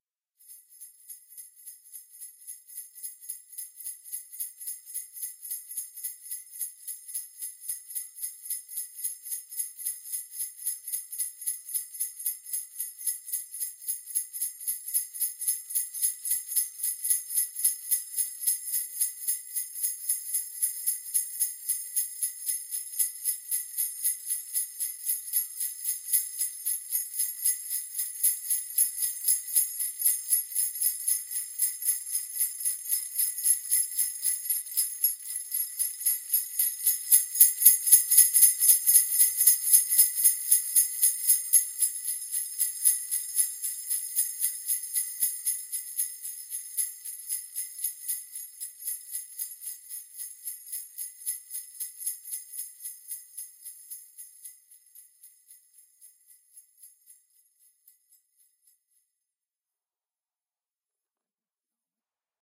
sleigh bells recording.7ST fade
Hand sleigh bells recorded on Tascam DR22.
Fades in and out.